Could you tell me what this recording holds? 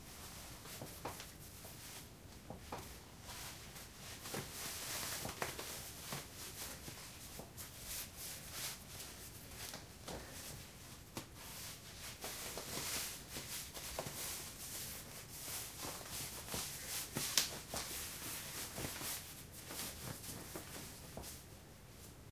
dressing-nylons
Dressing nylons --> soft sound